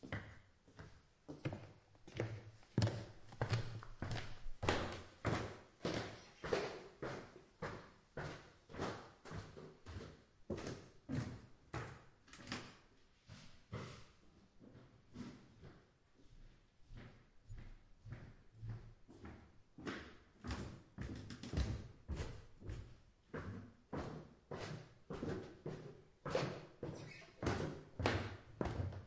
Walking up-and-downstairs a wooden stair in my indoorshoes. Recorded with ZOOM H1.